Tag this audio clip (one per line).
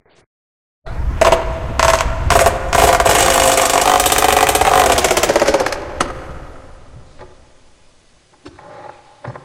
Fail
Fan
Propeller